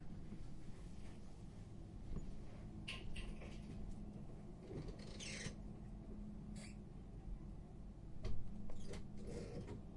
Light switch bathroom door
Switching on the light on the corridor, then opening and closing the bathroom door which has a squeaky handle.
REcorded with a Zoom H1.